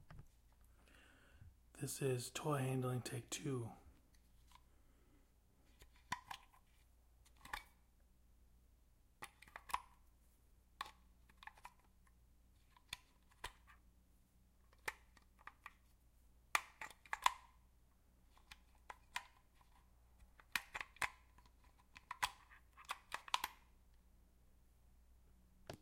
FOLEY toy handling 2
What It Is:
Me handling a metal car, Speed Racer's Mach 5.
A young girl handling a toy horse.